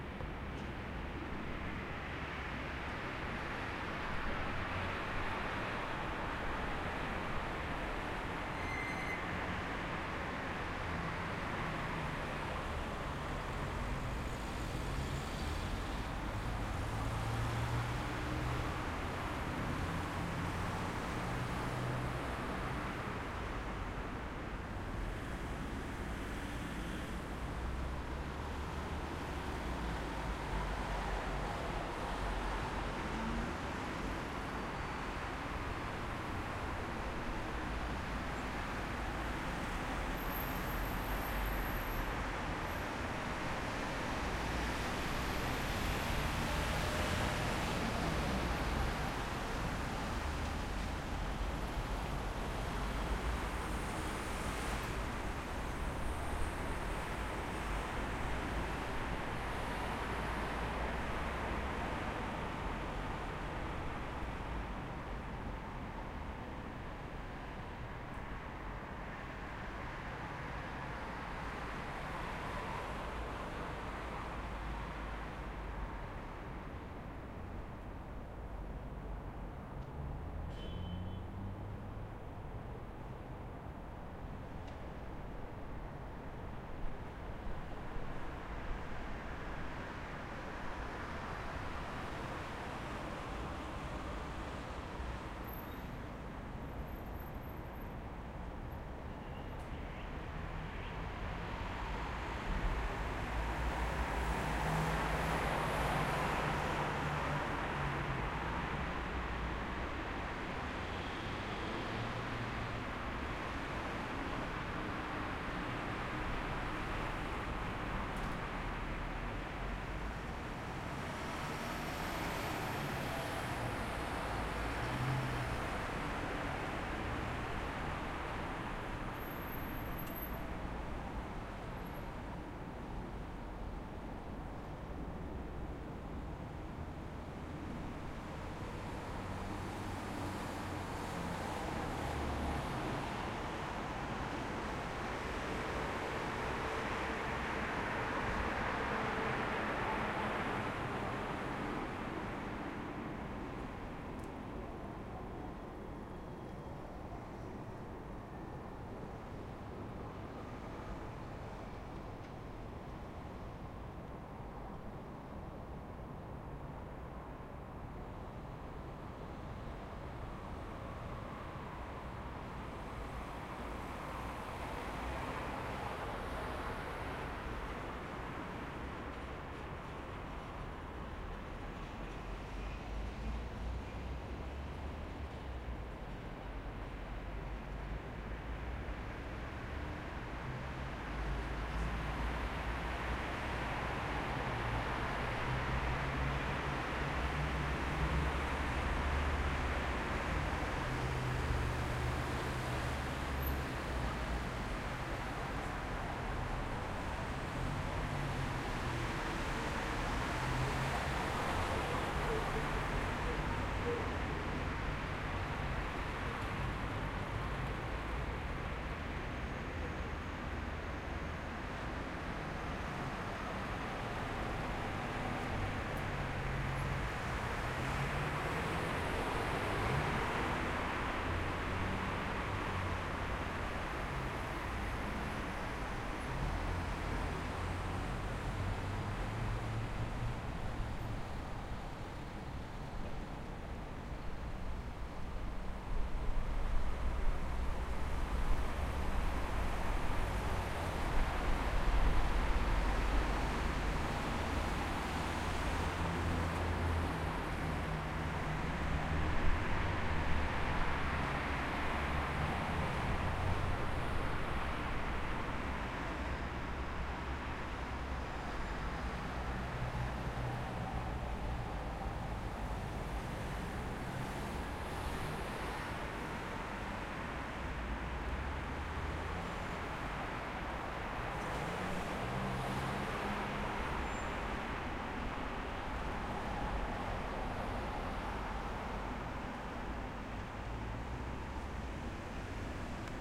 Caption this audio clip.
Traffic medium city dry road xy 90 degrees recording
XY field recording of medium traffic in dry conditions. Recording made from a 3rd floor balcony above a street. Recorded on a Zoom H4n using the built in mics configured to 90 degrees.
idels, door, apartments, mitsubishi, ford, medium, arrives, drives, holden, honda, dry, 90, mercedes, chevrolet, balcony, horn, diesel, engine, bmw, auto, breaking, cars, car, departs, avenue, feild-recording, ambience, lada, city, asphalt